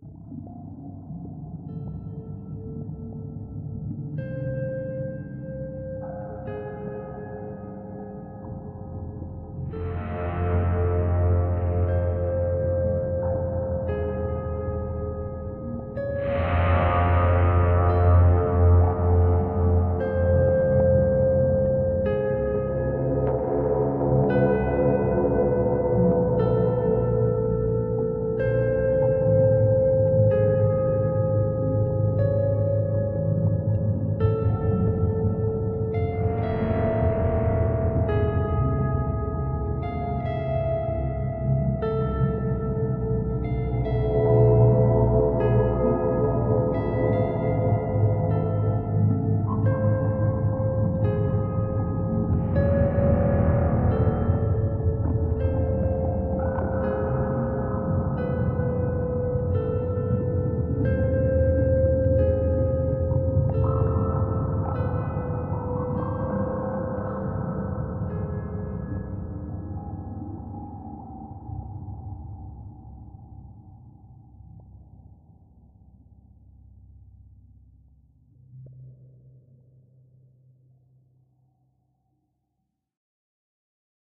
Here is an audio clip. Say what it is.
Made this sound with a base and a guitar.